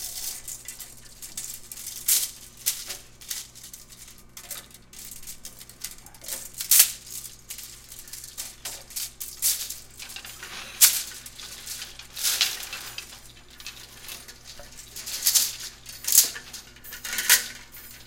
Seamstress' Hangers on Clothes Rack
Recorded at Suzana's lovely studio, her machines and miscellaneous sounds from her workspace.
fan, scissors, hanger, Seamstress, Rack, button, fashion, Clothes